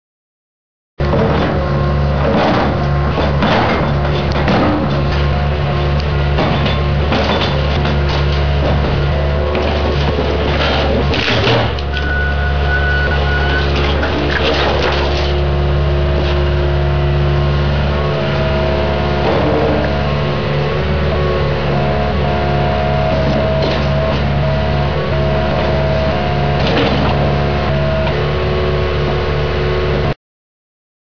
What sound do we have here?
Engine sound
Small cinder-block building being torn down by John Deere tractor. Bricks smash, wood splinters, treads clank, engine revs and idles, safety beeps are heard.
beeps, clanks, engine